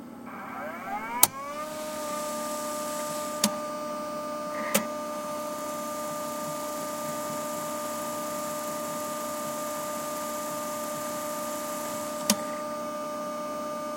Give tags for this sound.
cue; digital; dvw500; eject; electric; field-recording; jog; machine; mechanical; player; recorder; shuttle; sony; technology; video; vtr

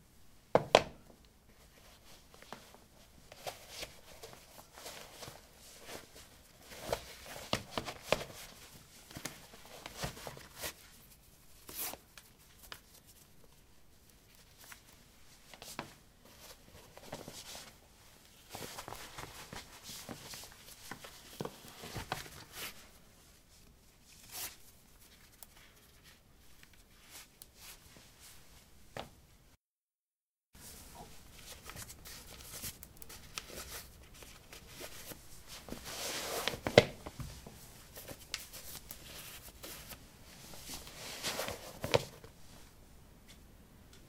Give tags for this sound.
steps; footsteps; footstep

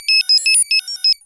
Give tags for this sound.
blip; computer; sound

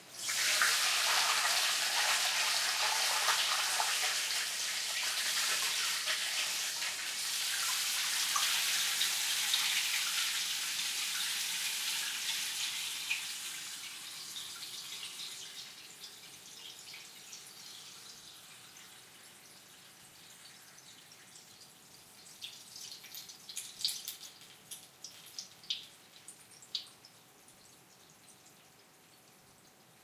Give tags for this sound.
flow; liquid; pipes; stream; water

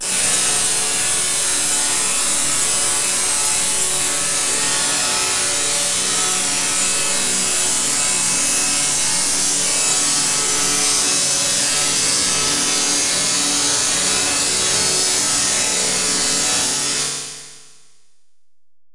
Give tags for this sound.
grain; metal

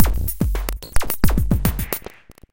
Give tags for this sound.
experimental; procesed; glitch-loop

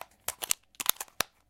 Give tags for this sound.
clip; weapon; gun; magazine; gameboy-advance; hangun; reload

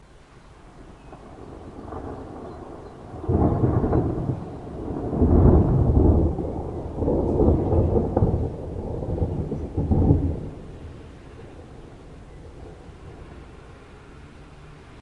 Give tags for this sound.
field-recording; nature; thunderclap; rain; thunder; streetnoise; thunderstorm

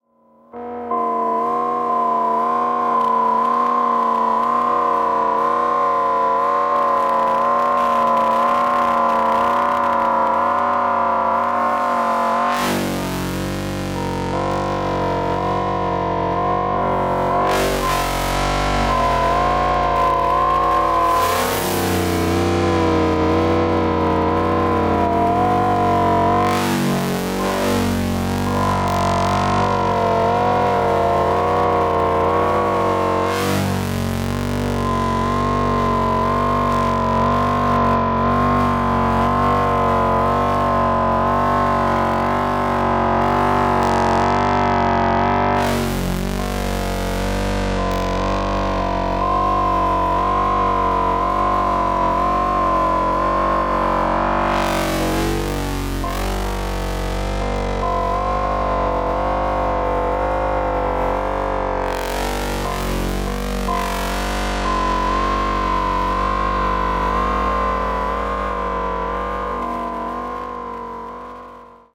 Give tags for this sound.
synth; weird; synth-library; electronic; noise; synthesizer; modular

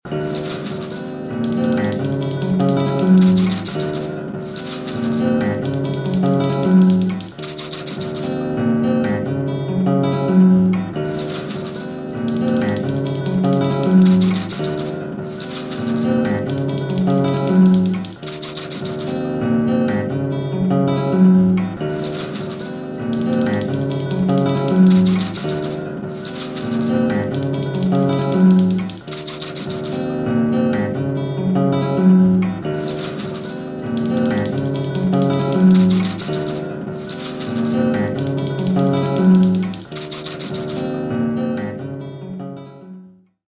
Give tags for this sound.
ambience; anthropology-of-sound; music; Pozna; synth

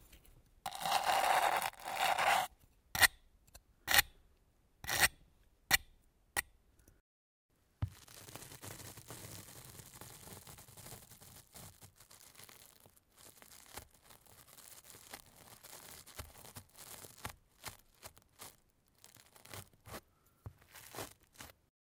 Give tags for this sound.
brush; cepillo; close-up; madera; wood

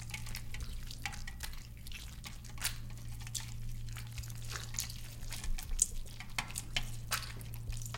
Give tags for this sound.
grapes; smooching

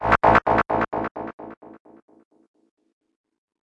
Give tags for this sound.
gameaudio; sfx; sound-design

